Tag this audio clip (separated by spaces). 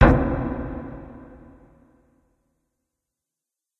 Dramatic Game Impact Machine Metal Reverb Video Video-Game